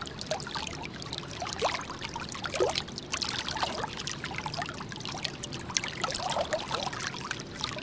Water filter in a swimming pool, Sound has been unedited and will need cleaning
water, filter, running-water, fluid, drip, swimming-pool